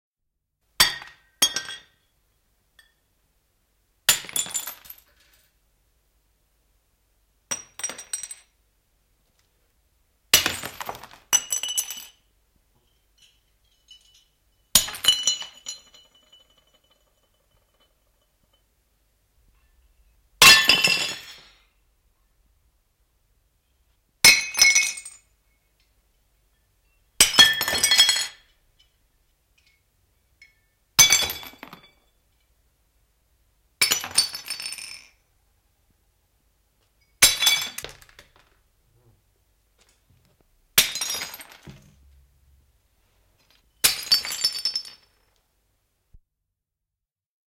Astioita rikki / Cups, plates, small porcelain objects fall and break on the floor, splinters tinkle
Pieniä posliiniastioita, kuppeja, lautasia, putoaa ja rikkoutuu lattialle, sirpaleiden helinää.
Äänitetty / Rec: Analoginen nauha / Analog tape
Paikka/Place: Yle / Finland / Tehostearkisto, studio / Soundfx archive studio
Aika/Date: 1973
Astiat, China, Cup, Fall, Field-Recording, Finland, Finnish-Broadcasting-Company, Posliini, Pudota, Rikkoutua, Soundfx, Yleisradio